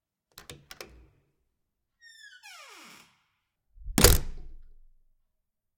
apartment door open squeak slam
An apartment door is opened, it squeaks and it is slammed.
Recorded with the Fostex FR-2LE and the Rode NTG-3.